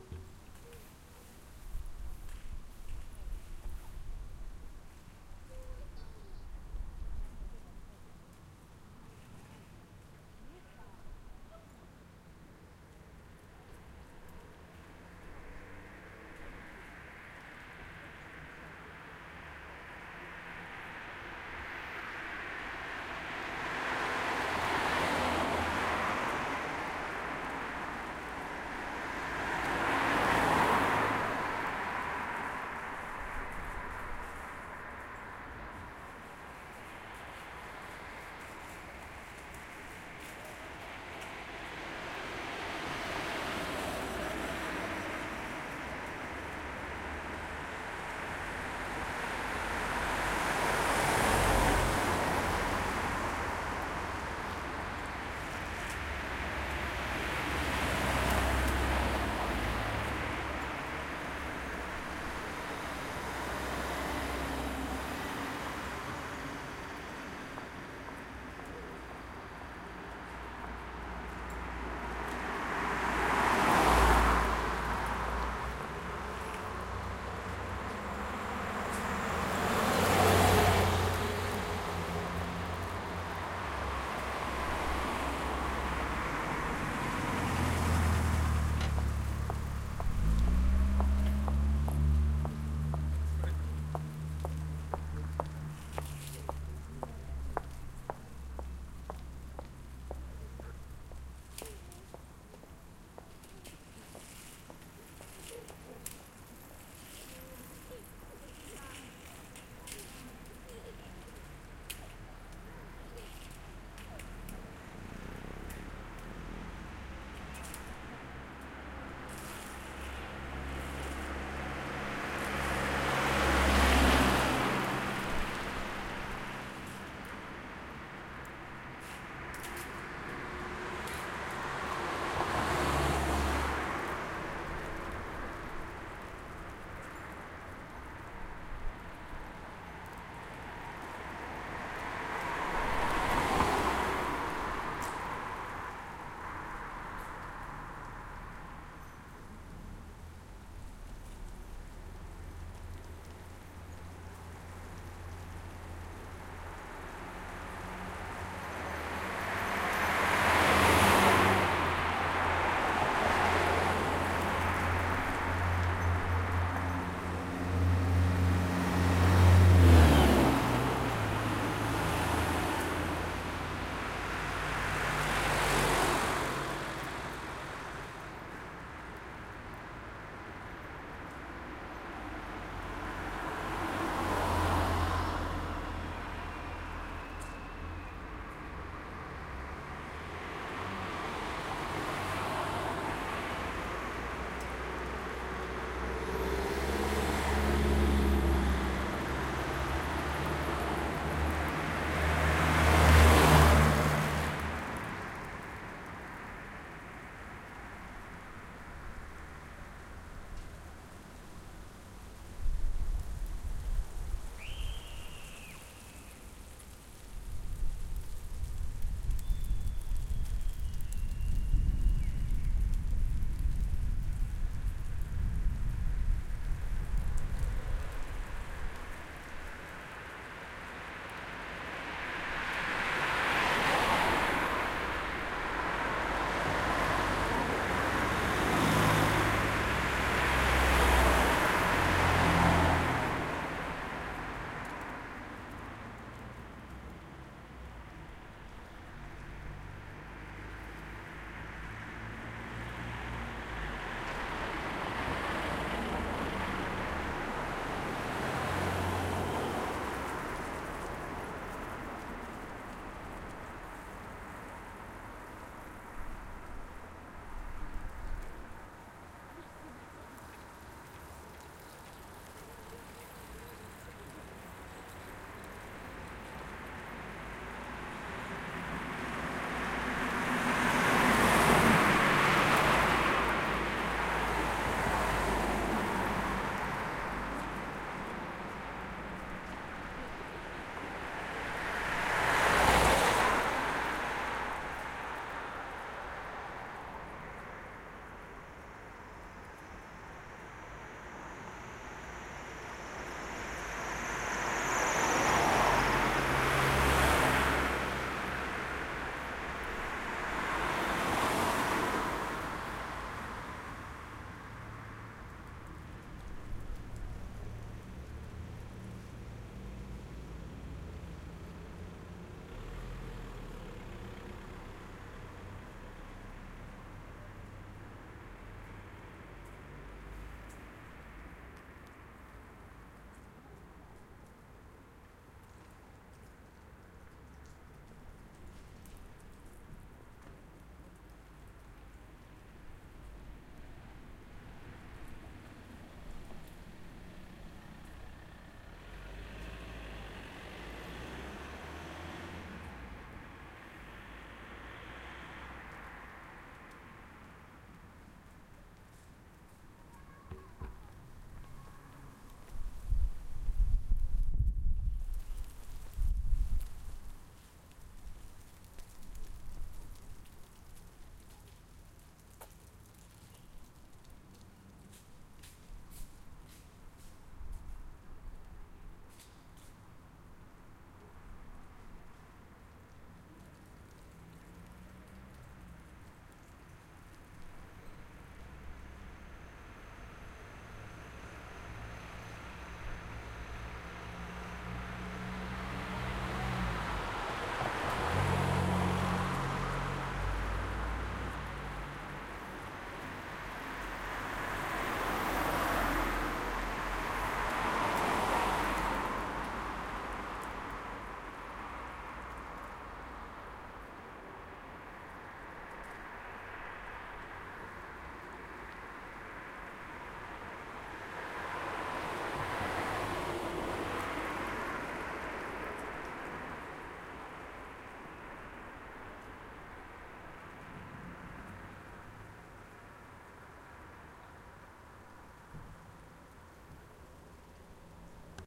Recordered with Zoom H4n on an evening street of Swinoujscie town in Poland. 6pm.